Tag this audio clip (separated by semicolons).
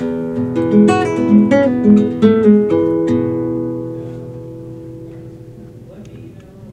arpeggiation; Guitar; Nylon; strings